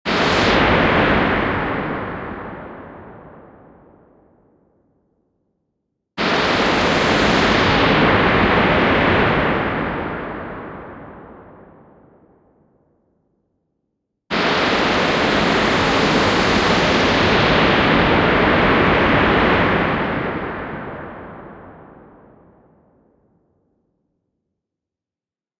Bubbles to Noise #5
A transition from a "bubbly" sound to noise, with a big reverb. Created using Logic synth Hybrid Morph.
Sci-fi, Bubbles, Transition, Electronic, Hybrid-Morph, Space, Noise, Futuristic-Machines